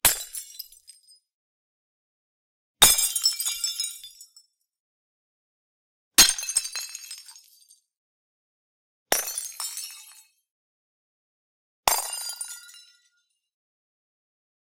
Glass Bottle Breaking
Smashing beer bottles and other glass objects on concrete floor.
Recorded and performed by students of the Animation and Video Games career from the National School of Arts of Uruguay, generation 2021, during the Sound Design Workshop.
Oktava MK-12
Zoom H4n
Manfrotto Carbon Fiber Boom Pole
bottle, break, shatter